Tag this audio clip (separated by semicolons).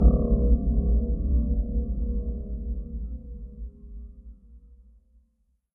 deep; dark; processed; fx-sound; rubberband; hit; dusk; metal; long-decay; space